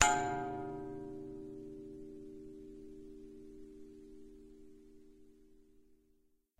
bell sound made in a stairwell hitting a railing
bell, chimes, percussion, gong